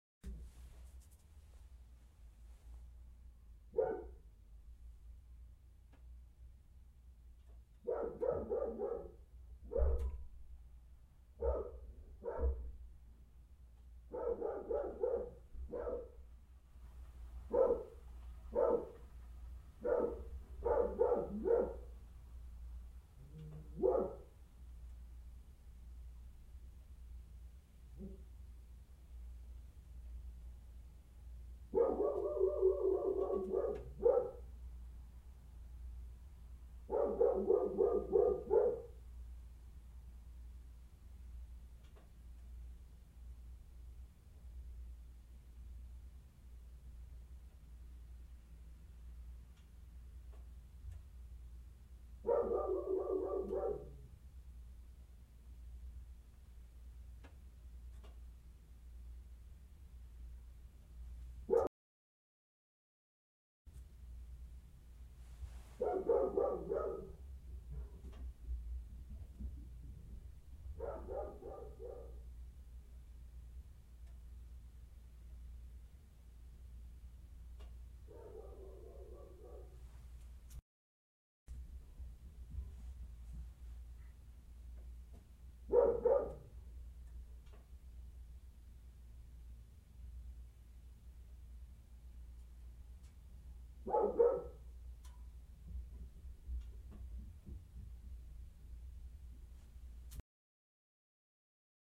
large dog barking in other room 1
Large dog barking downstairs while I was trying to record. Well suited for sound design of a large dog barking in another room/on another floor. Mic- Neumann tlm 102